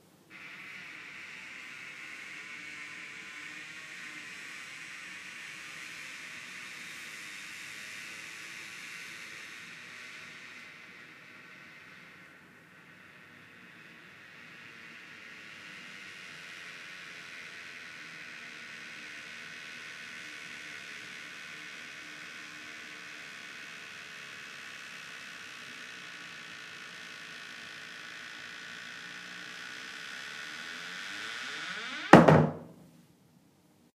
Creaking Door
A long sound of a very creaky door
wooden, closing, close, wood, squeaky, open, door, creak, opening, clunk, creek